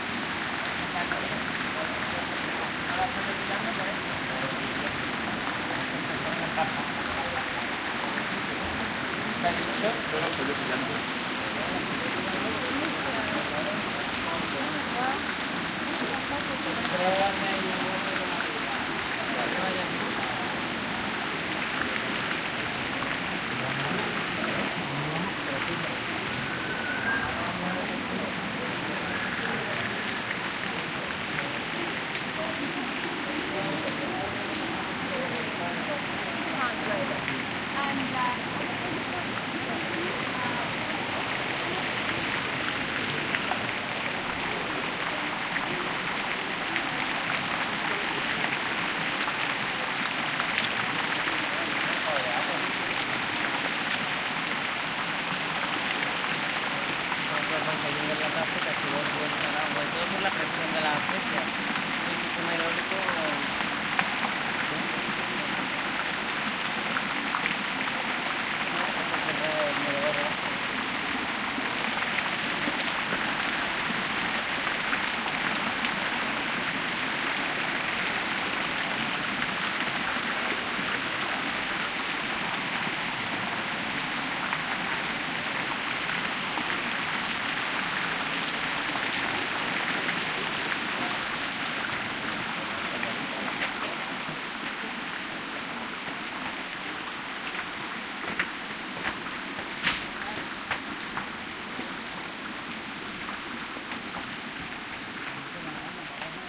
water splashing in Patio de los Arrayanes (Alhambra Palace, Granada), tourist voices (how not) in background. Soundman OKM mics, FEL preamp and Edirol R09 recorder